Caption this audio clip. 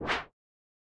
a user interface sound for a game